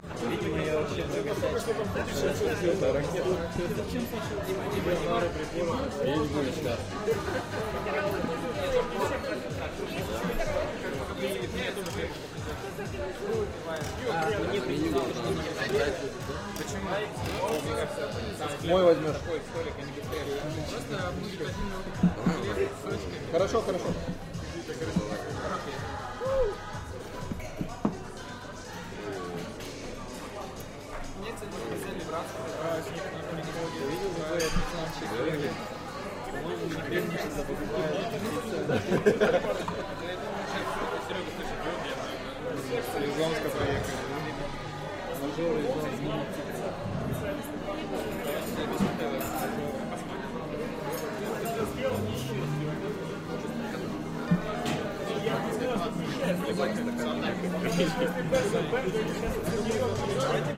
atmosphere in the pub - short
Atmosphere in the pub. Russian speech and laughter. Sound of cutlery.
My company is resting at the bar when we went to the Codefest conference.
Novosibirsk, Russia.
2012-04-01
Short version.
ambient, 2012, eat, evening, people, friends-company, russian, beer, rest, speak, atmosphere, background-music, pub